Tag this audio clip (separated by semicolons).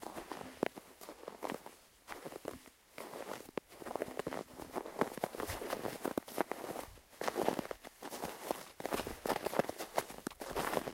steps; mountain; snow; winter